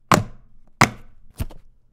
Open big military case
She hears I open an Outdore case from B & W International.
Recorded with: the t.bone sc400
Recording software: Adobe Audition (2017)
open, box, army, case, military